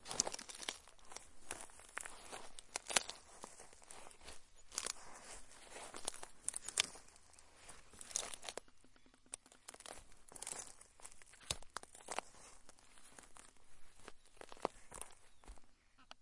plant crackle
Sounds of crackling plants
plant, crackle